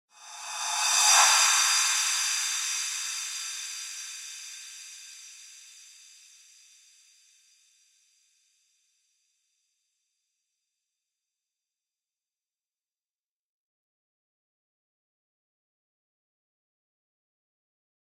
Rev Cymb 18 reverb
Reverse Cymbals
Digital Zero
metal, cymbals, reverse, echo